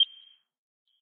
Short beep sound.
Nice for countdowns or clocks.
But it can be used in lots of cases.